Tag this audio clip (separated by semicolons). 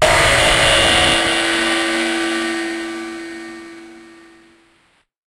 Distorted; Distorted-Drum-Hit; Distorted-Drums; Distorted-One-Shot; Distorted-Single-Hit; Distorted-Splash-Cymbal; Distorted-Splash-Cymbal-One-Shot; Distorted-Splash-Cymbal-Single-Hit; One-Shot; Overblown-Splash-Cymbal; Splash; Splash-Cymbal; Splash-Cymbal-One-Shot; Splash-Cymbal-Single-Hit